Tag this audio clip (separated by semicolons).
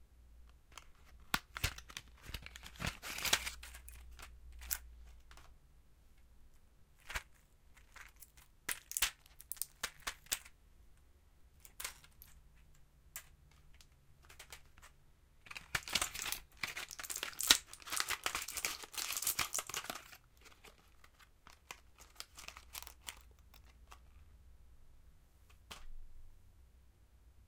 blister; medicine; metal-underlay; plastic; tablet